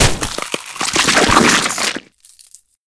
sound of something being exploded into tiny fleshy pieces.
Created by mashing together many different sounds.
tear, horror, pour, splat, effects, horror-effects, flesh, creepy, horror-fx, fx, crunch, blood, squelch, break, vicera, intestines, gore, bones, death, wet